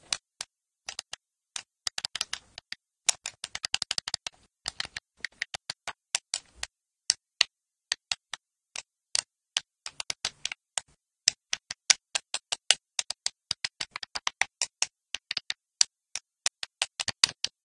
Sound of "clicks", made using a playstation 2 joystick, recorded with a very simple microphone and edited to be cleaner.
button, buttons, click, clicks, joystick, playstation, press, pressing, ps2